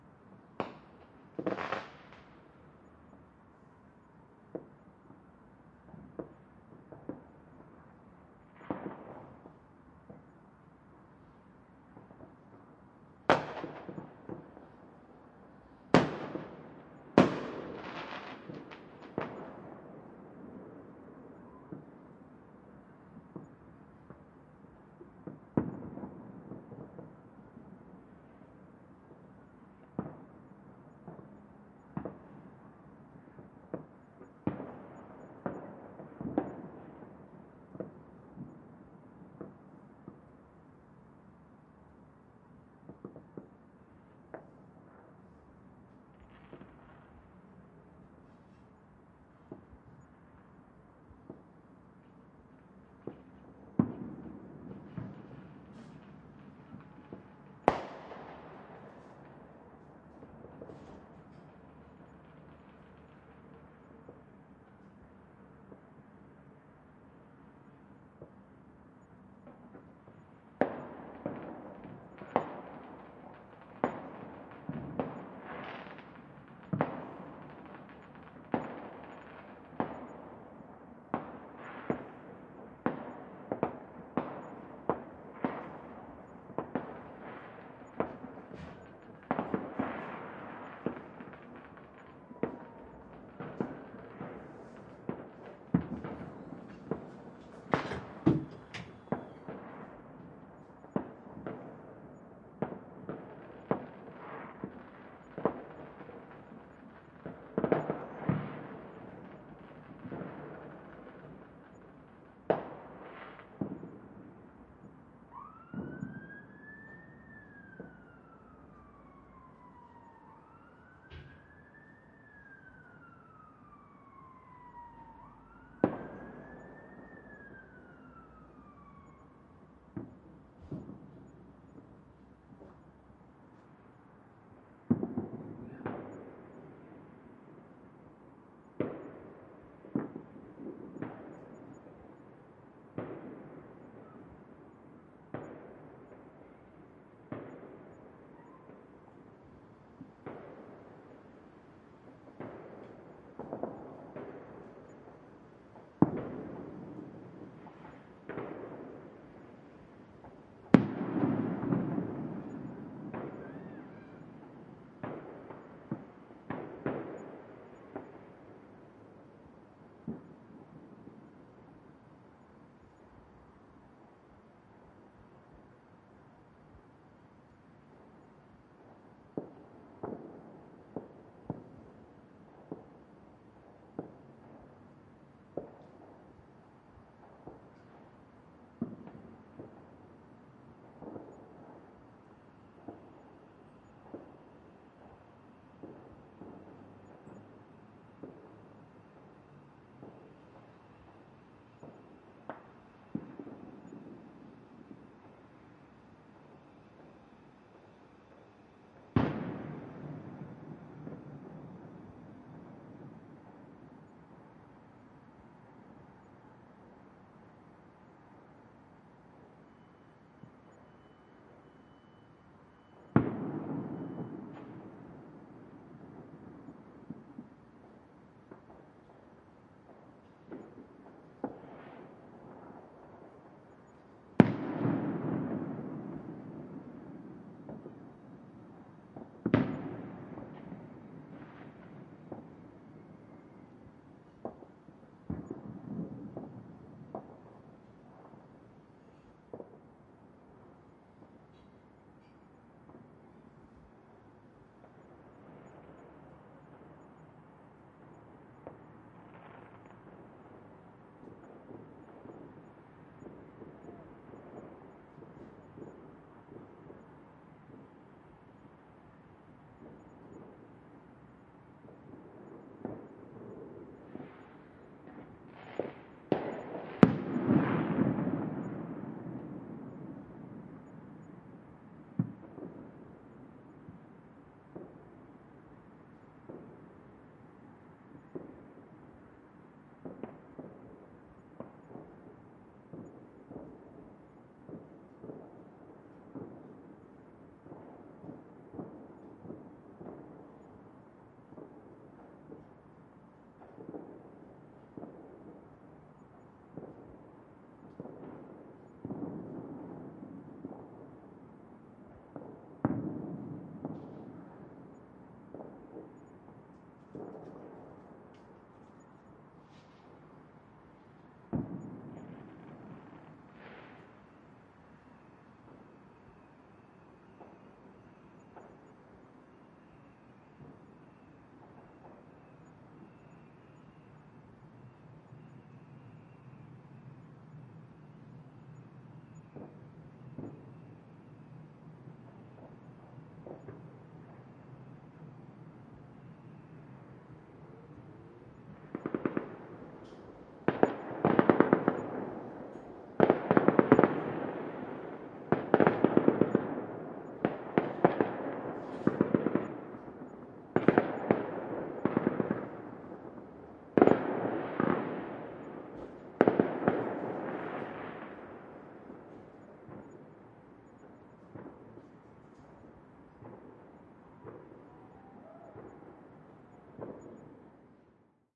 Ambiance sound of distant fireworks during Guy Fawkes day in the UK. Light city traffic, residential area background and some sirens every now and then. Could be used as distant explosions or gunshots with a bit of editing. Stereo XY recording using two shotgun mics on a zoom F8.